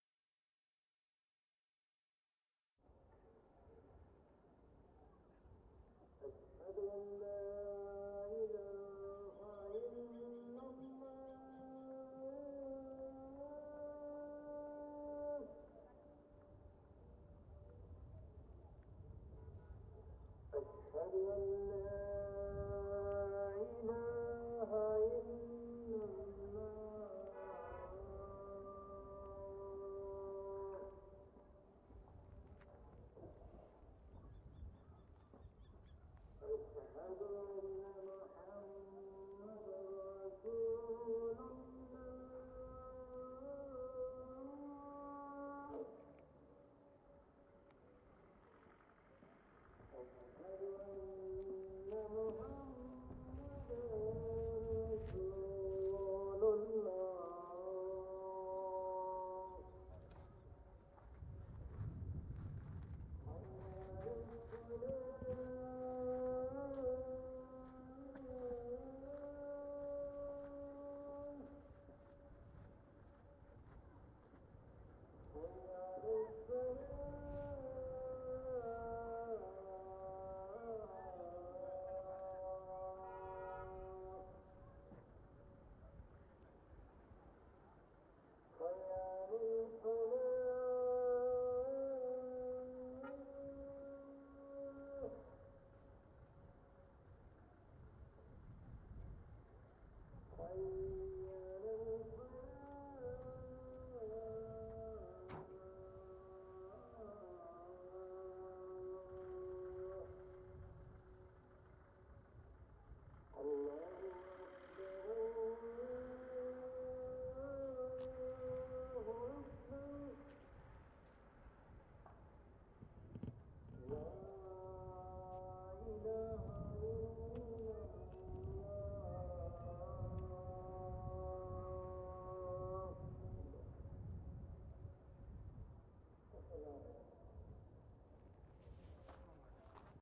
This is a sound of the Azaan, heard from the top of a hill in leh town in the evening